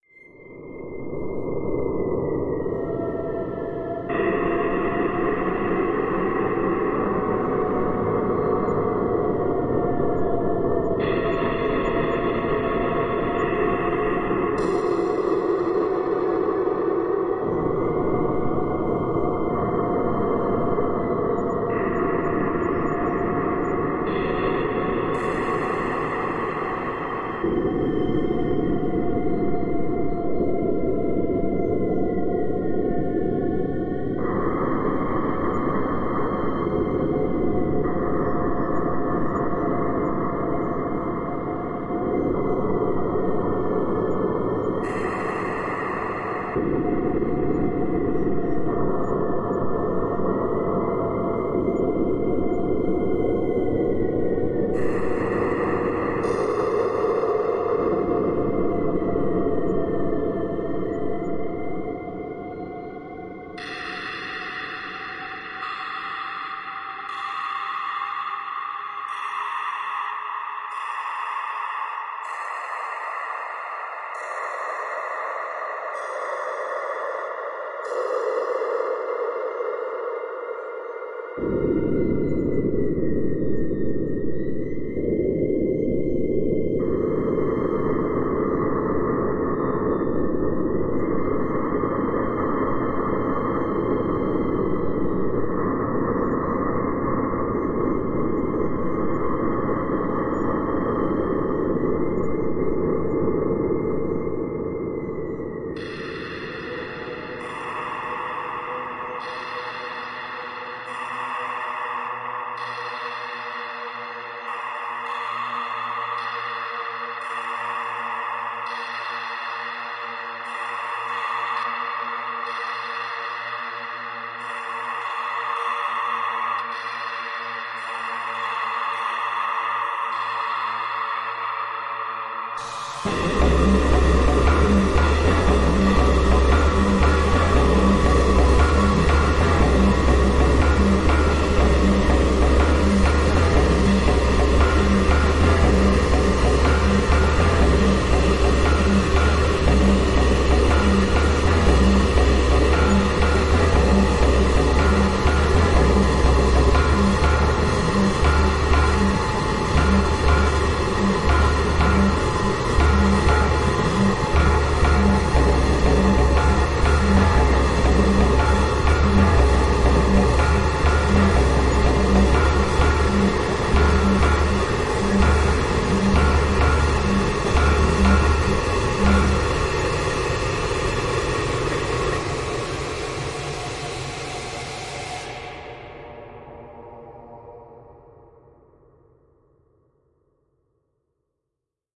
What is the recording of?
05.You Will Never Find Me From Here
"Somewhere In Between" has five different ambient tracks. Some of them are more rhythmic or music like.
ambient, eerie, ominous